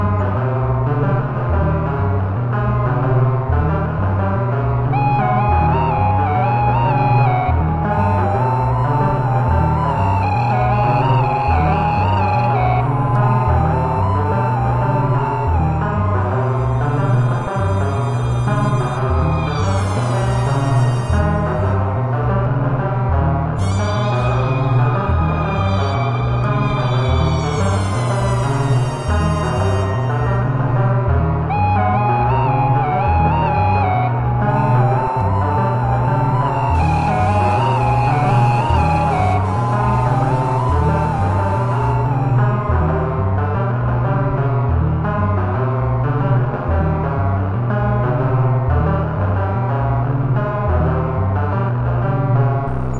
soundtrack for the labyrinth area in the game Myelin Alpha, more or less loopable, played on Korg R3 and Arcturia Timbre Wolf